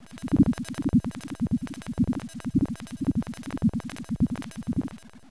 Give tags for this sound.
8-bit
arcade
chip
chippy
computer
decimated
game
lo-fi
machine
noise
retro
robot
video-game

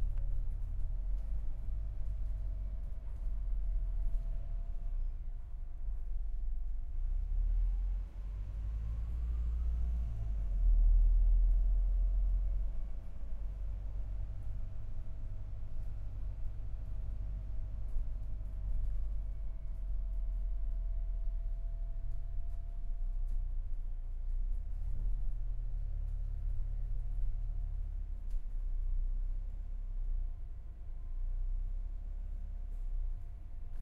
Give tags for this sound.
Ambience
Low

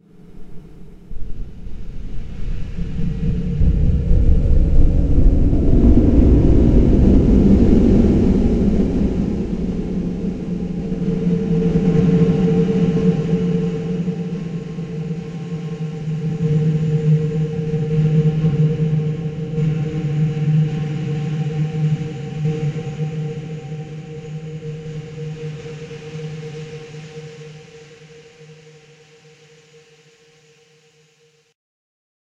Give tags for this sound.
feedback
processed
wind

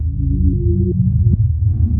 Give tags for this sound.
backwards
creepy
keyboard
loop
reverse
reversed
short
spectrogram

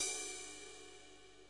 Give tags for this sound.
cymbal
drum
figure
kit

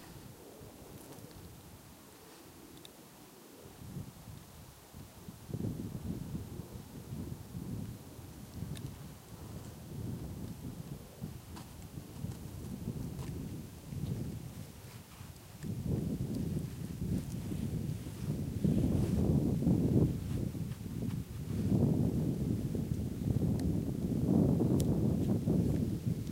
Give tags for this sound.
island
florida

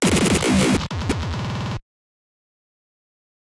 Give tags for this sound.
glitchbreak
pink
h
o
k
deathcore
l
processed
t
y
love
e
fuzzy

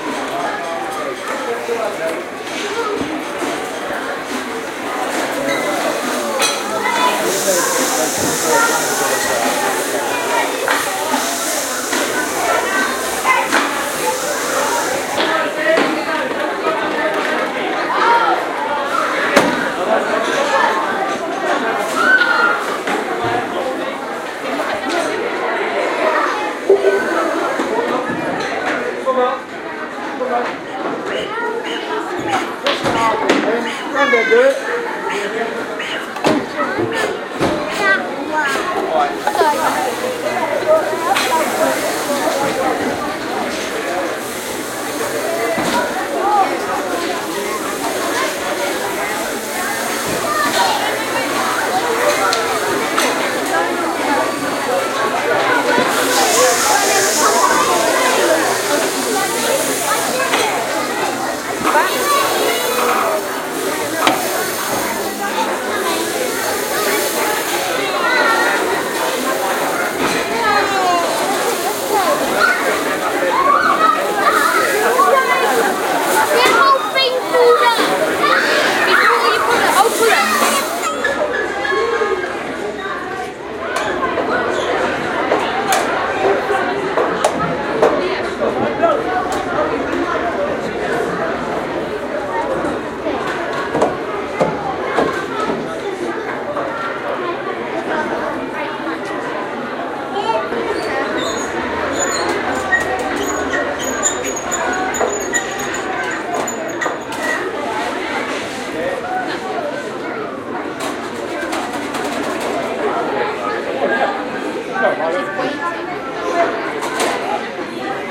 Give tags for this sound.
background
children
field-recording
indoor
London
noise
people
shouting
voices

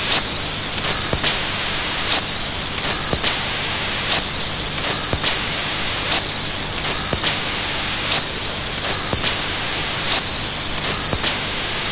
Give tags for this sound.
noise
loop
circuit-bent
atari

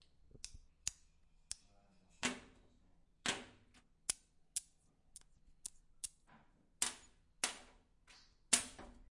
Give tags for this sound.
magnet-on-chalk-board
magnets

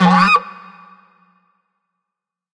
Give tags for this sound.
short
organic
sfx
deep
effect
didgeridoo
oneshot

